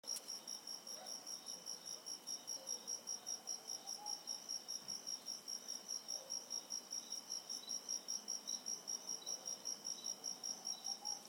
Crickets at night (2)
Crickets at night.
Location: Nono, Cordoba, Argentina.